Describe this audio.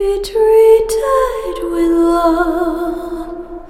soft feminine female vocal "be treated with love"
Soft female vocal, singing "be treated with love". This was a clip that I was going to use in a song, but discarded because it didn't fit the way I wanted.
Recorded using Ardour with the UA4FX interface and the the t.bone sct 2000 mic.
You are welcome to use them in any project (music, video, art, etc.).